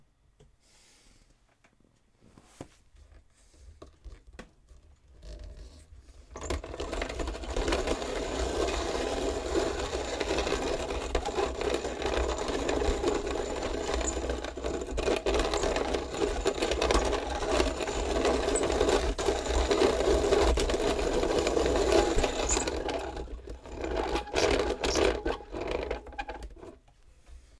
Remember granny using a handmill for coffee? All used the same mill, a eight inches wooden cube with a iron handle for rotation, a metal cup which you opened for refill and a drawer for milled b ready-to-use coffee. There was sort of Peace and feeling good when you saw and heared this scean